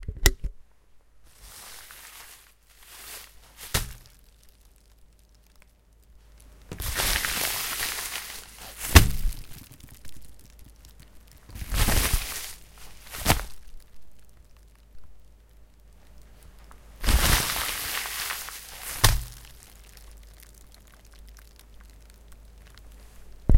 droping salami wrapped in paper
Droping salami wrapped in plastic like paper.
drop, fall, plastic